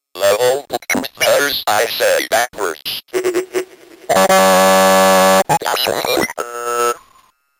letters i say back
PHRASE FOLLOWED BY COOL DIGITAL NOISES. one of a series of samples of a circuit bent Speak N Spell.